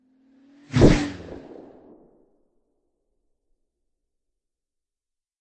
Bullet whiz slowed
The samples I used were:
#78091 Ricochet 2_2 - Benboncan
This is a time-stretched 'Epic-er' version ;)
bang, bullet, crack, fly, gun, pop, rifle, shoot, slow-mo, swish, swoosh, time, whiz, whizzes, whoosh, zoom